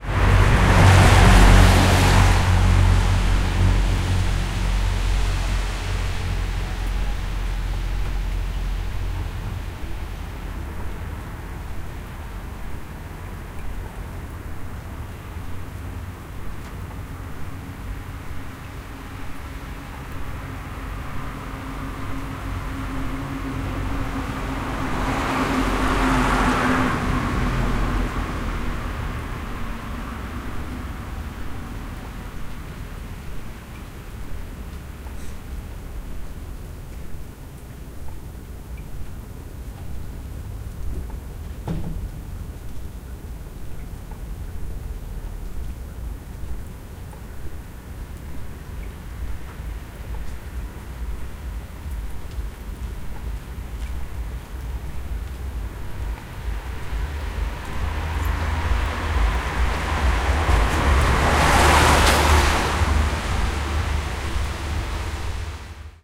after rain wet road car passby urban ext night
Night recording of a urban environment, right after some rain. Lots of wet.
car, exterior, night, road, urban, wet